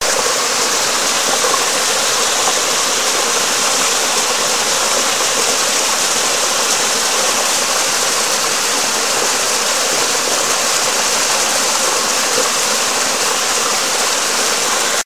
Waterfall 01 (loop)
sound of a waterfall
you can loop it